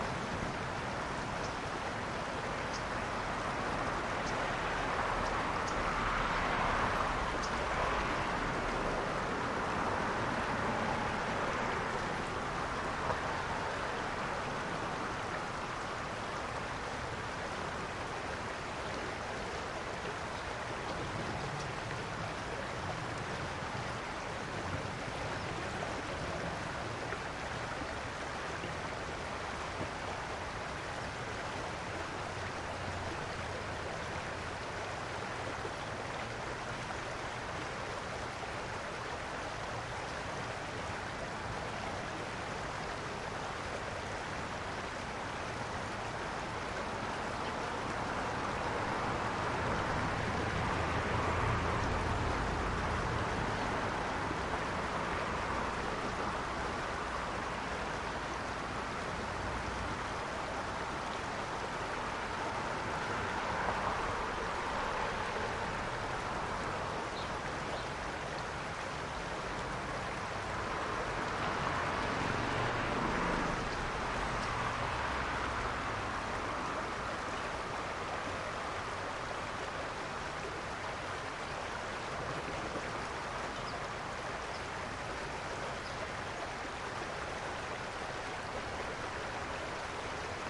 LosGatosCreek AuzeraisAve T02
Urban ambient captured from Los Gatos Creek near Auzerais Avenue in San Jose, CA, USA. Sampled on February 12, 2011 using a Sony PCM-D50 and built-in microphone. Mostly water noise from the creek below the bridge as traffic passes by behind.
wikiGong, water, Sony-PCM-D50, traffic, bridge, field-recording, ambient, urban, built-in-microphone